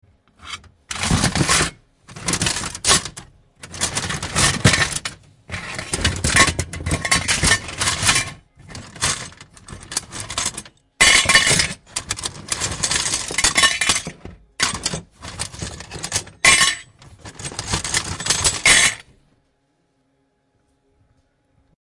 Sounds of various alluminium cuts moving in box. Real metal sounds.
alluminium, field-recording, metal, moving, real, sounds, various